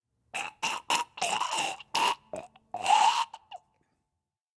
SZ Zombies 06
A real zombie moan. Recorded from a live zombie.
cough, groan, gurgle, moan, throat, vocal, voice, wheeze, zombie